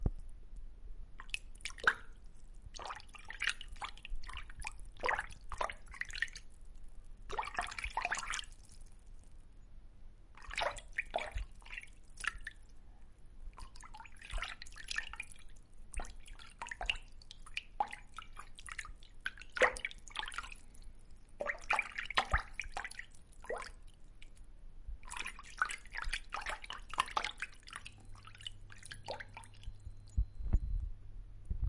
fish in river
fish in flow
fish, flow, river, water